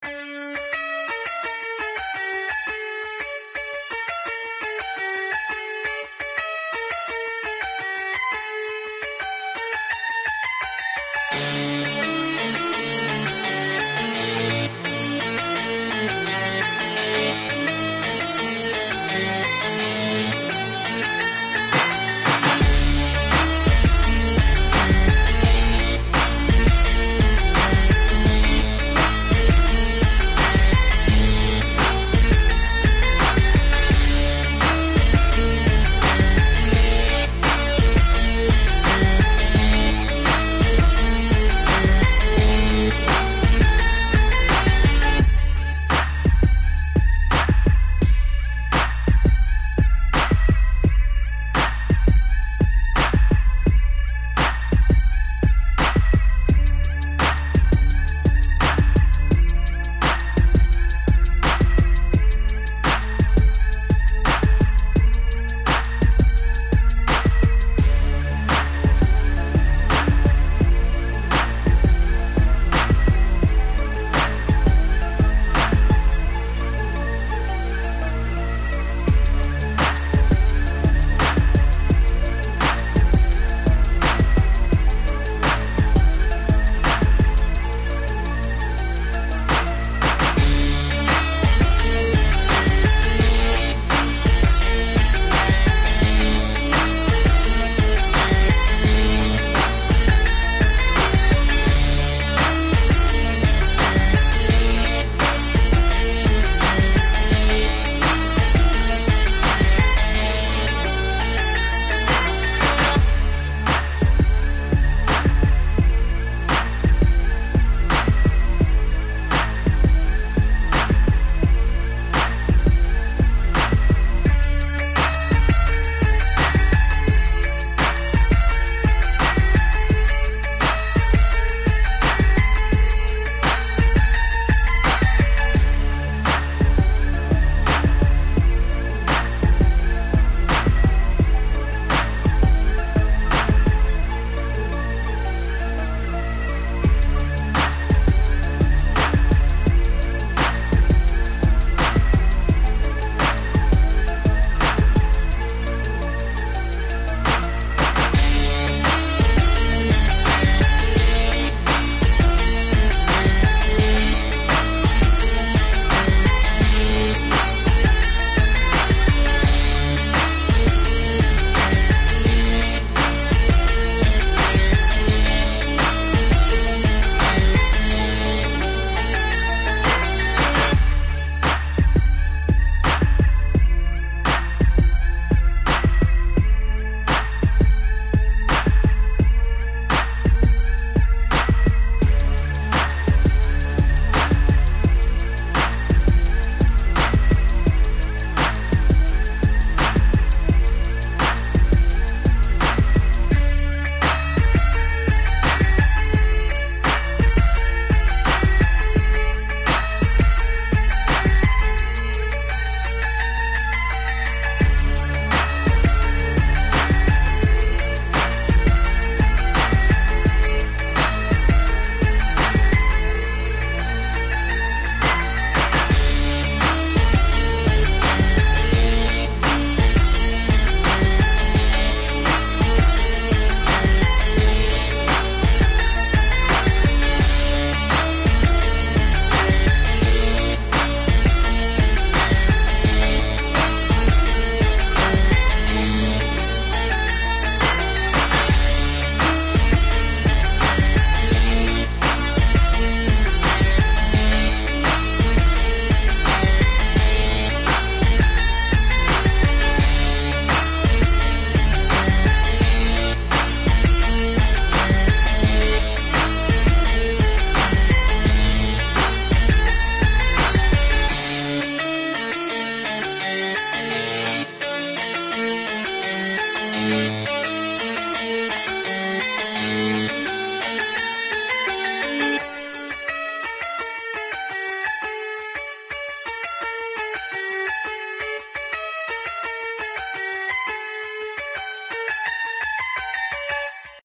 I used this music track for an experimental animation. This song rocks hard with a very electric tone. Very fast paced and in your face. Great for cinematic use! Created in FL Studio
acid-rock, band, dance, electric, guitar, hip-hop, metal, music, rock, rock-band, surprise, suspense, suspenseful